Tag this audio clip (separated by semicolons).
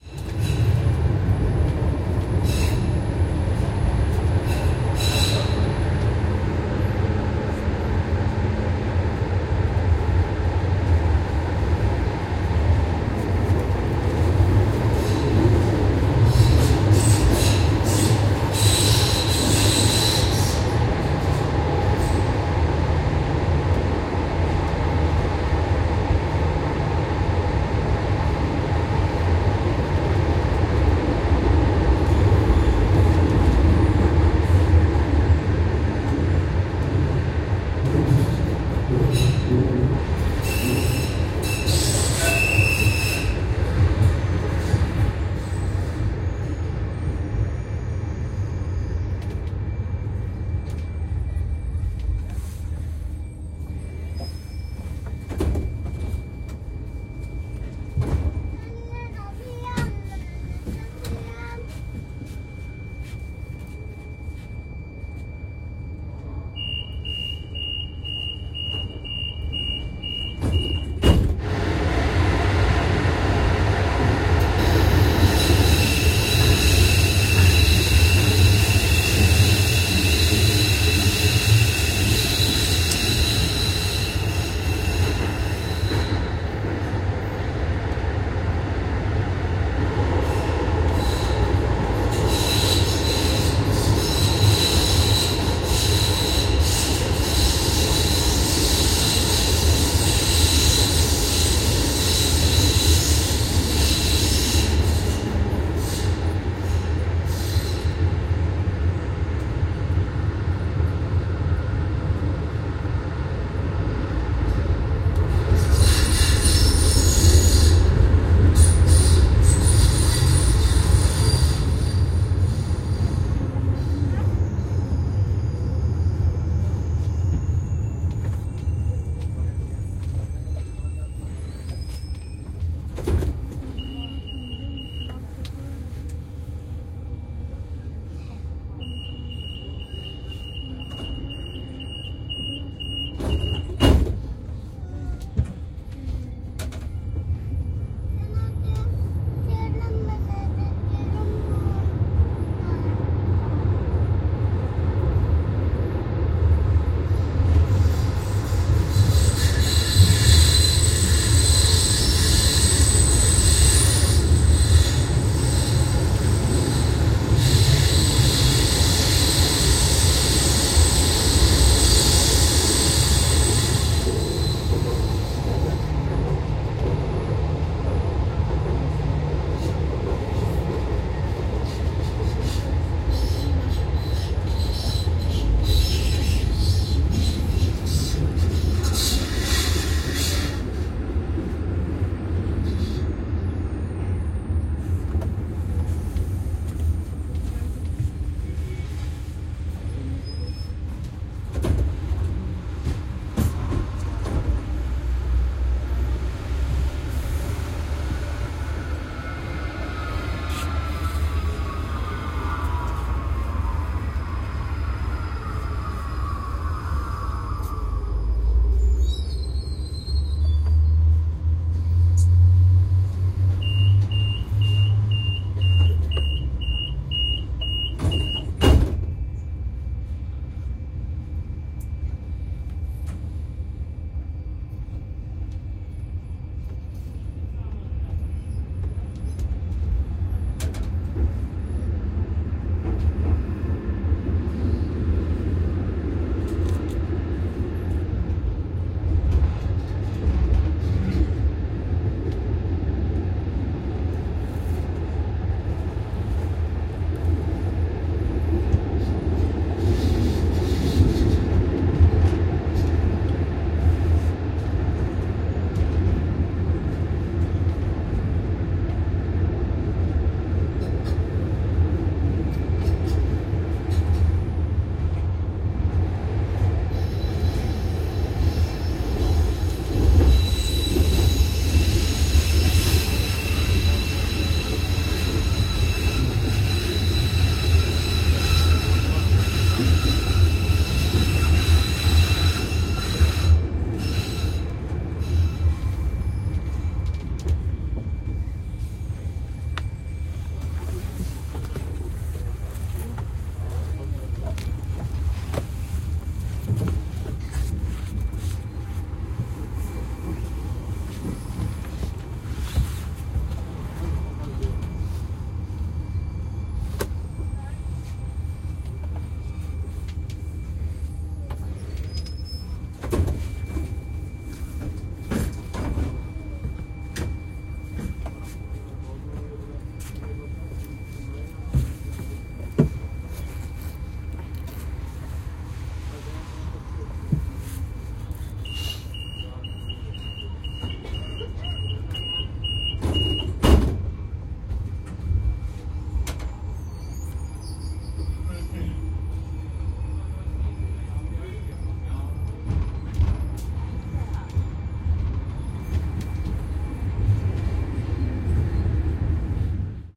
doors-closing; doors-opening; field-recording; passengers; Tram